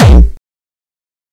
Distorted kick created with F.L. Studio. Blood Overdrive, Parametric EQ, Stereo enhancer, and EQUO effects were used.
beat, hardcore, drumloop, distorted, drum, progression, kick, synth, kickdrum, techno, bass, distortion, trance, melody, hard